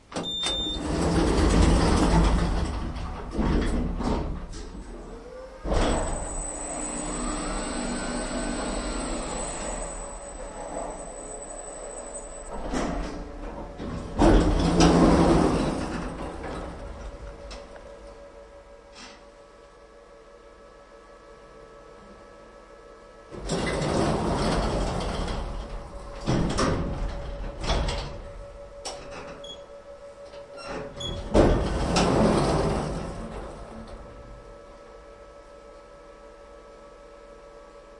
In an elevator : doors closing, button bipping, move one floor, doors opening again.
bip, button, doors, elevator, lift